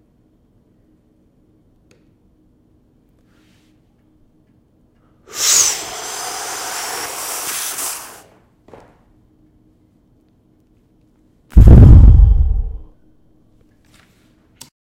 Breathe In, Blow Out
I inhale slowly and exhale against a microphone.
breathing, MTC500-M002-s13